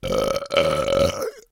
A serious burp recorded with a with a Samson USB microphone. Not intended for humor or pranks, this one is for serious burp aficionados.
human, sound, burp, body